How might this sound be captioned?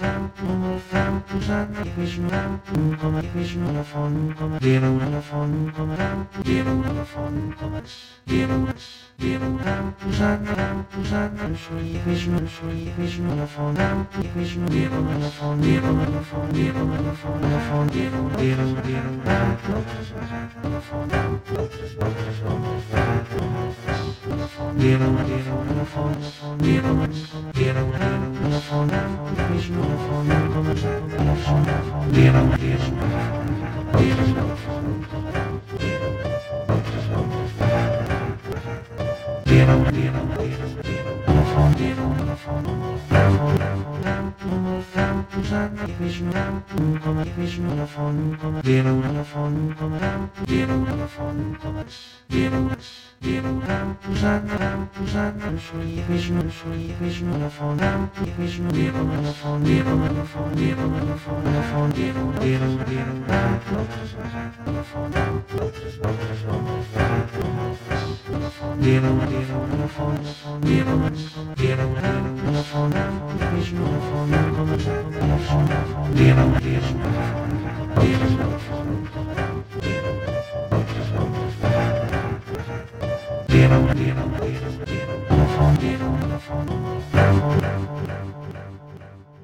Schumann Kreisleriana - VII. Sehr Rasch
This is my final assignment for ASPMA Course 2016.
A small time-stretching transformation, morphing with piano chromatic scales, and finally arranged by a python script to produce the final audio output.
You can find the python code here:
Format:
aspma-16-results, aspma-16, fugue, morphing, speech-male